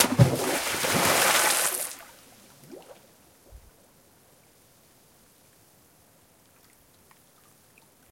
Tossing rocks into a high mountain lake.